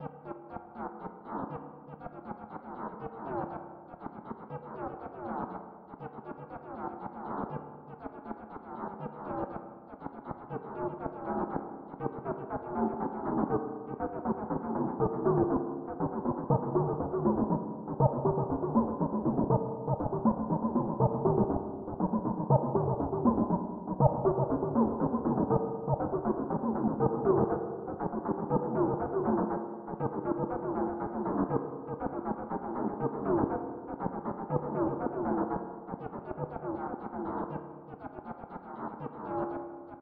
A strange rhythmic sound. I have not saved any presets or made any records. I do not remember how the sound was created. I think it was most likely made in Ableton Live.
This pack contains various similar sounds created during the same session.
synthetic, rhythmic, echo